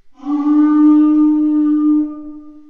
Prillarhorn is a cow-horn that is blown like a trompet, it has holes in it so it can be played like a flute. It's quite ancient and was used up to the 1500's.